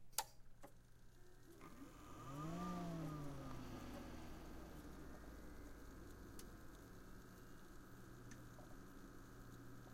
a computer booting up